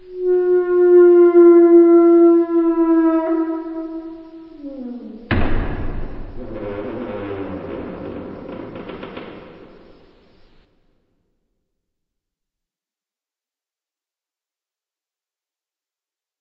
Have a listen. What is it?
Door creaking 04 2 with Reverb
slam, wooden, opening, close, rusty, lock, creaking, hinge, squeaky, shut, door, handle, open, creaky, slamming, squeaking, clunk, hinges, squeak, closing, wood, creak